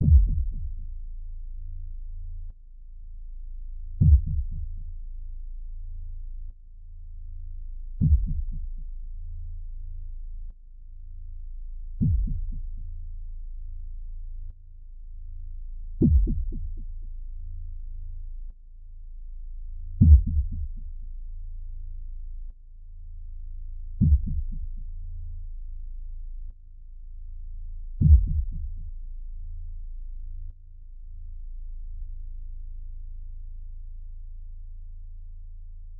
Basspad (Confined)
A bass pad I made on Figure.
bass, pad, beat, synth, pulse, heart, deep, bass-pad